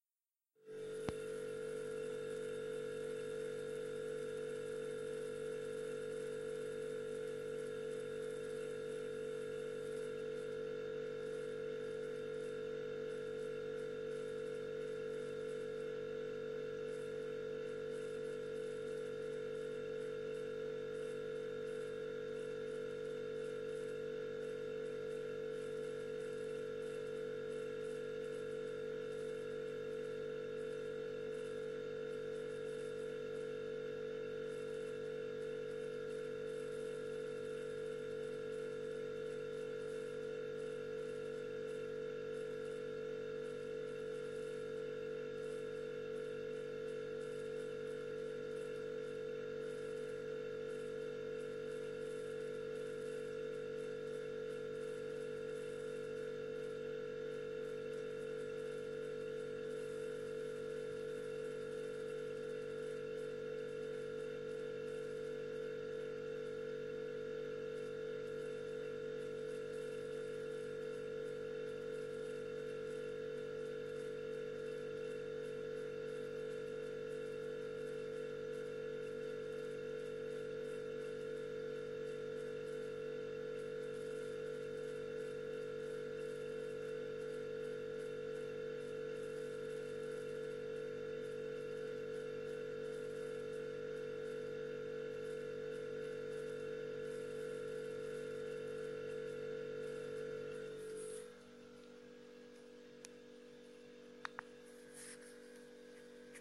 Content warning
Sound of the Refrigerator on
appliance, electric, electrical, electricity, freezer, household, hum, kitchen, refrigerator